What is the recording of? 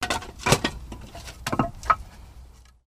Falling logs in a woodshed 05
Falling logs in a woodshed
Recorded with digital recorder and processed with Audacity
crashing, logs, quake, collapse, fall, rattle, falling, rumbling, shake, crash, collapsing, wood, rattling, blocks, rumble, pile, shudder